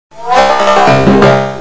Dumbek
sample processed thru
flange. Recorded at 22khz